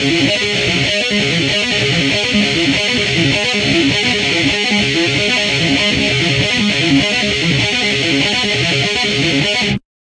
REV LOOPS METAL GUITAR 4
rythum guitar loops heave groove loops